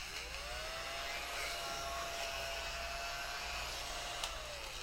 Farm tractor improvised using other things
spanish, Farm, foley